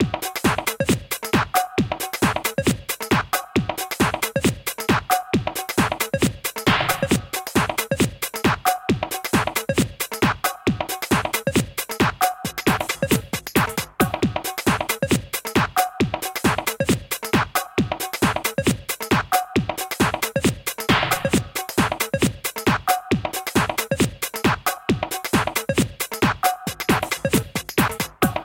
Pcyc bottlecap pop drums
A bouncy drum groove with melodic elements and quirky off-beat transition that reminds me of pop-bottle caps.
blip, bottlecap, bounce, conga, drums, glitch, off-beat, pop, techno, tone